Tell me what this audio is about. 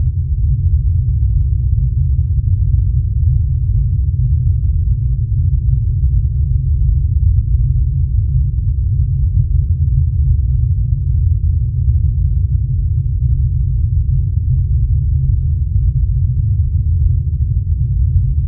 Yet another synthetic, seamless loop. You can use this to mimic the ambient noise of some large vessel traveling under large engine power, but keep it in the background where it won't interfere with dialog or whatever. This could be a yacht, a airliner, a starship, or whatever. Created in Cool Edit Pro.